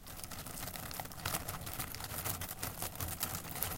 Short potpourris rustling sound made by stirring a bowl of it
crackle, crunch, potpourris, rustle, scrunch